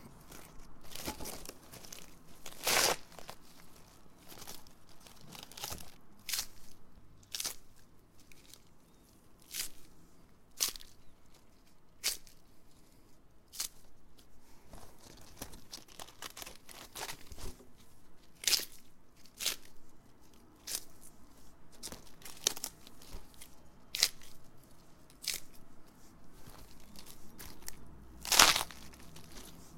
Lettuce twisting
Foley used as sound effects for my audio drama, The Saga of the European King. Enjoy and credit to Tom McNally.
This is a succession of sounds of me twisting and snapping raw, dry lettuce by hand. It makes a crunching sound that can be useful for SFX of breakages, gore and possibly footsteps in snow. The track needs some cleaning up as some traffic sounds are audible in the background.
gore, breaking, lettuce, twisting, wrenching, crunching, SFX, foley, bones, snow